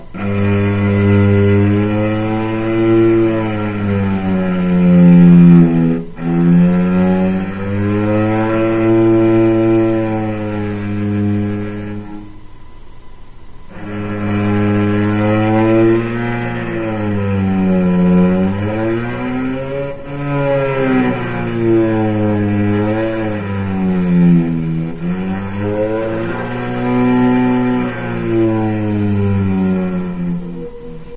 sound effect, created with a string instrument